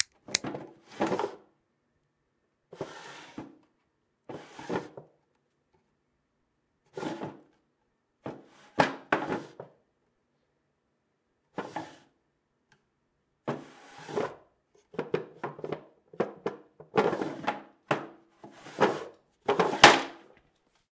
Opening and Closing Drawer
Desk drawer opening and closing.
close, wooden, sliding, drawer, shutting, open, shut, door, desk